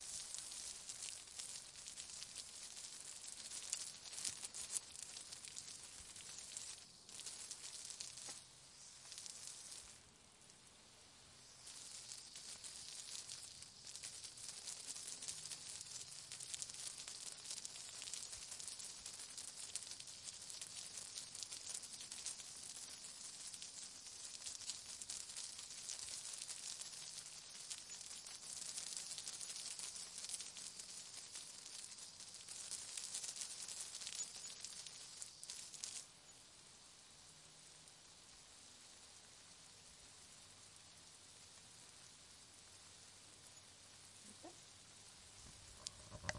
Water spray gun 1
Water gun garden hose
field-recording, garden, hose, spray, water